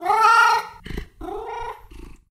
Cat meow sfx
animal, purring, cat, kitty, kitten, pet, meow, me